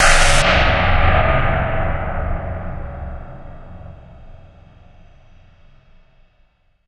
I made this sound with FL Studio by stretching a sample I had.